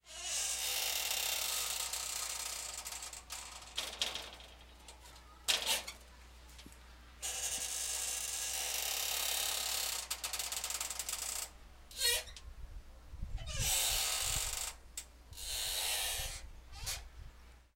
Sonido rechinante de puerta